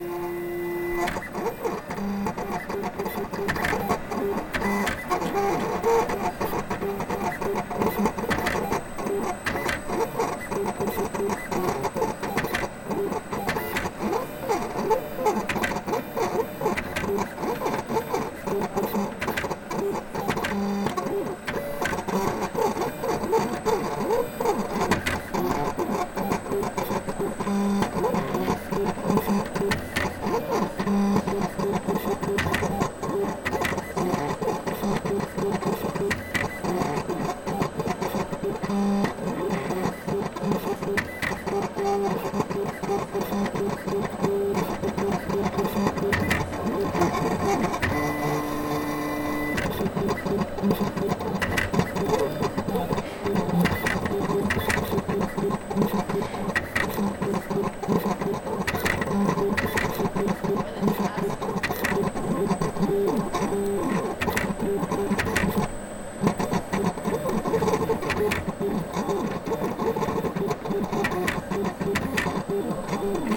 VINYL PLOTTER
electric, robot, abstract, sci-fi, 90